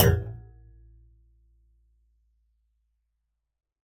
BS Hit 6
metallic effects using a bench vise fixed sawblade and some tools to hit, bend, manipulate.
Bounce, Clunk, Dash, Effect, Hit, Hits, Metal, Sawblade, Sound, Thud